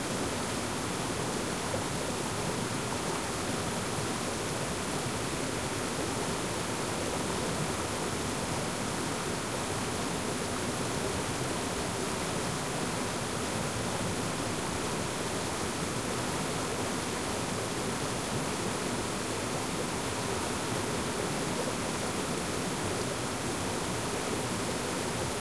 Ambiance (loop) of a waterfall.
Other waterfall's sounds :
Gears: Zoom H5